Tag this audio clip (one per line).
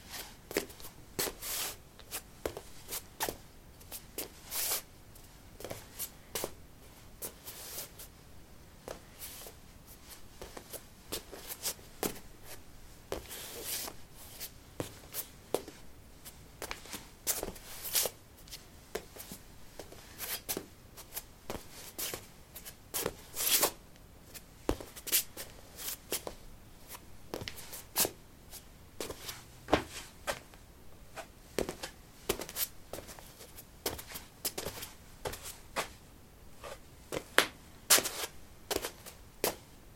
footstep
step
footsteps
walking
walk
steps